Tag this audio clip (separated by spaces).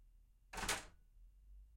doorknob door household sound-effect